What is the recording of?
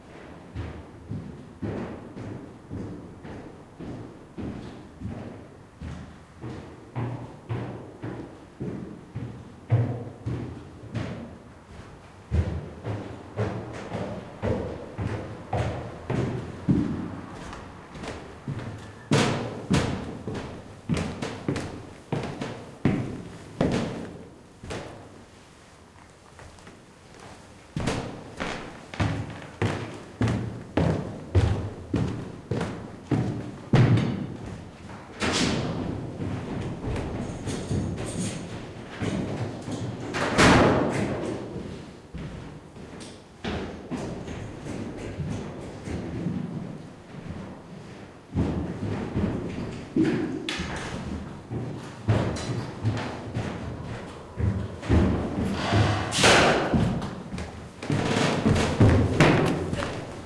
foot steps ste
a passenger goes upstairs and downstairs.
downstair, foot, steps, upstair